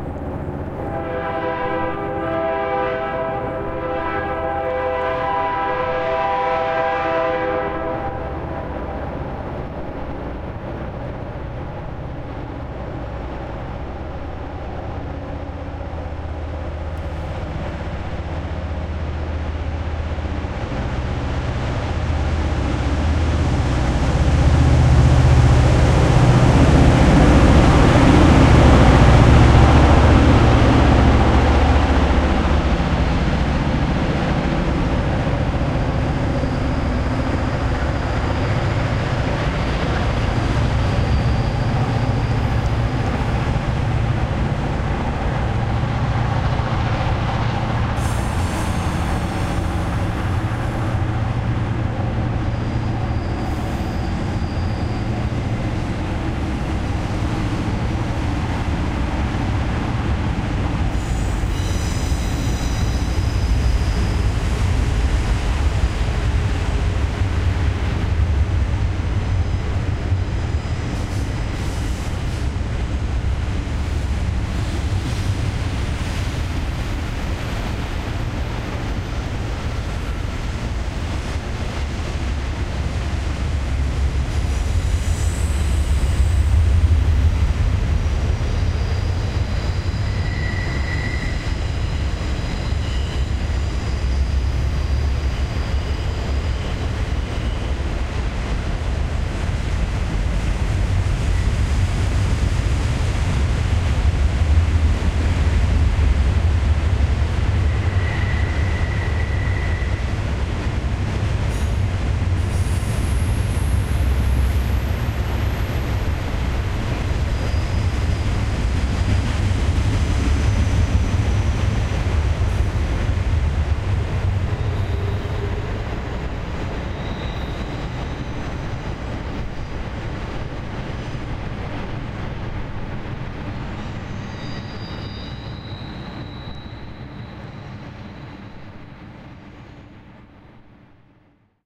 There is a point about 20 seconds in were it gets to hot, but non the less (IMHO)
is a very useable stereo recording
fx, sound